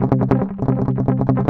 cln muted C guitar
Clean unprocessed recording of muted strumming on power chord C. On a les paul set to bridge pickup in drop D tuneing.
Recorded with Edirol DA2496 with Hi-z input.